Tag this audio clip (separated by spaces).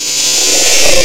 stab house fx hit hardstyle electro